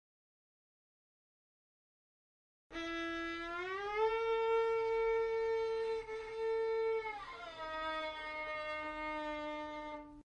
Violin on D string From E to A
Violin on d string dragging from E to A
arc, violin, string, non-electronic-effects